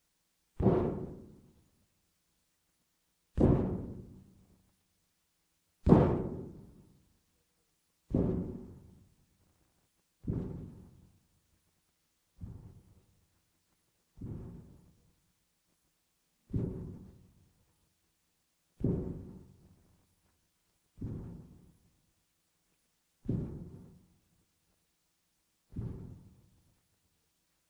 Low rumbles created by hitting a main ac unit's pipes.
stomp, air, conditioning, bass, rumble, a, low, boom, c, freq, ac